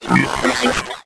A synthetic voice sound effect useful for a somewhat confused robot to give your game extra depth and awesomeness - perfect for futuristic and sci-fi games.
game, Speak, gamedev, computer, Robot, games, futuristic, indiegamedev, electronic, indiedev, videogames, gamedeveloping